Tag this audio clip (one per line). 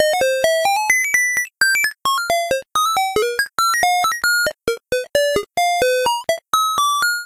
android
beep
beeping
computer
data
droid
electronics
high-tech
information
robotic
sci-fi
tech
technology